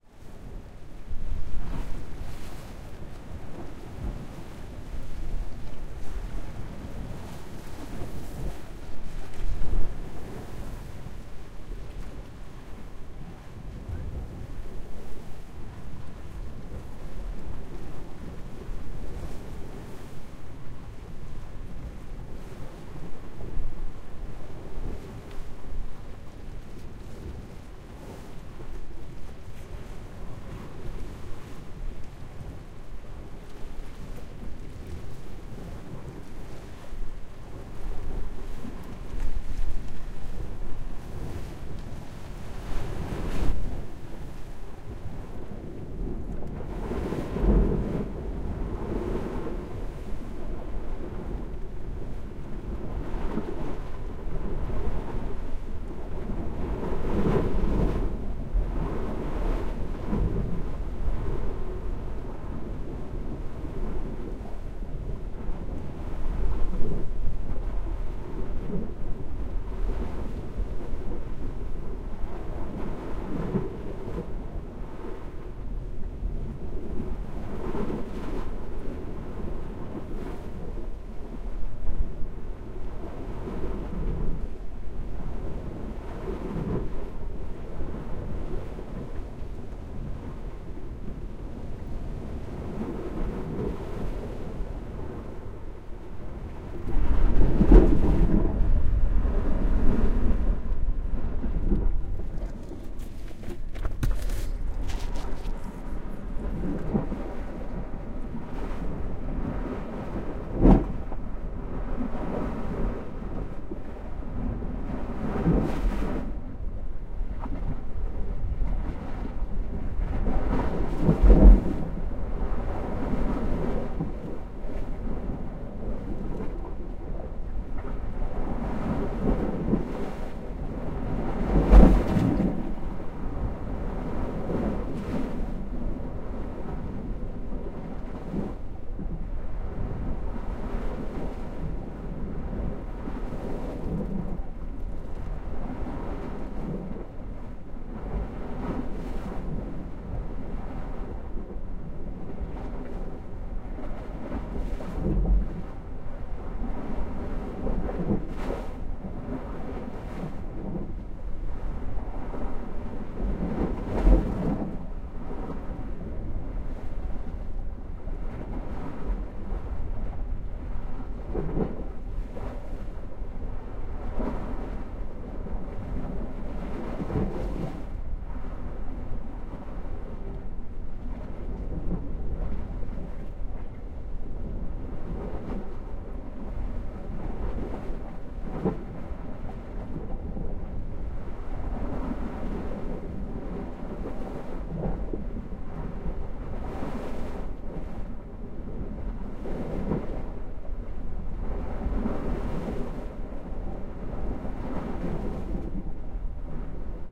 Sea (under a rock)
Phasing, Field-recording, Sea, Waves